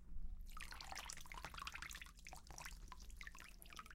Fish get out of water.